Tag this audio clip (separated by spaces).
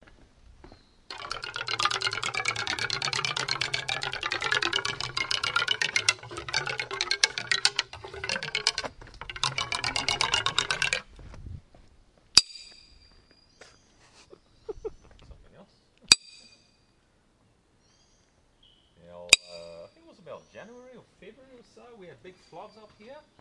fence hit impact metal stick tree wood